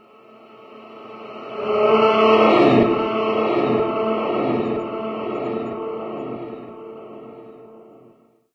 distant terror scream ambient sound effect 1